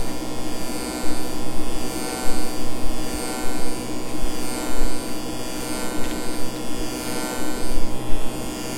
electric sound
robot sound,
sound recorded and processed with vst
alien, android, automation, bionic, computer, cyborg, droid, game, intelligent, interface, machine, mechanical, robot, robotic, robotics, space